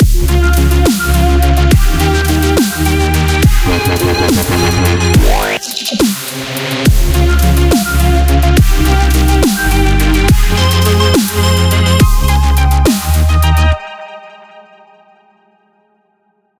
Melodic Dubstep loop
A nice dubstep loop for putting in your musicproject.
bass, beat, dance, drum, dubstep, loop, melodic, techno